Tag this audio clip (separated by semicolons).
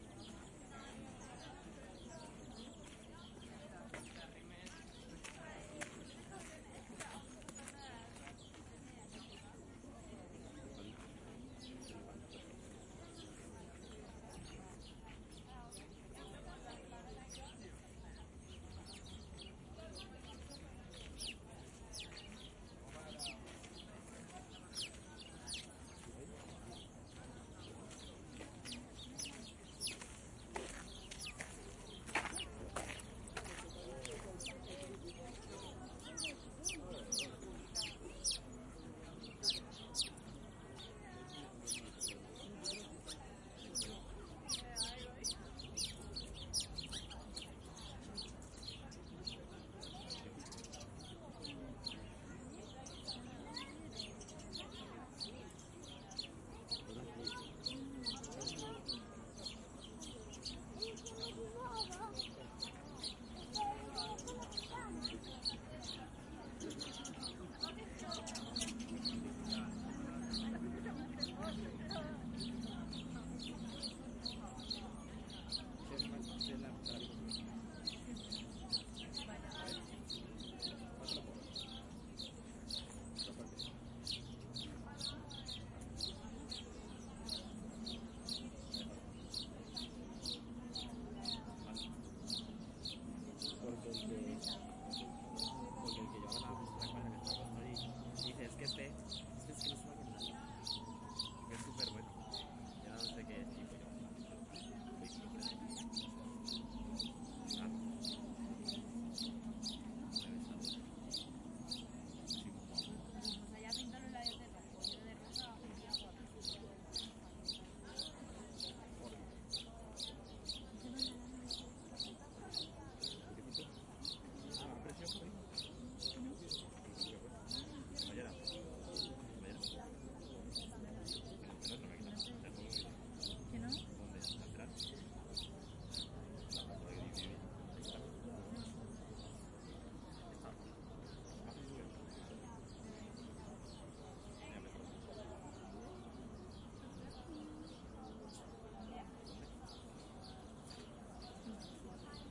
day; park; Spain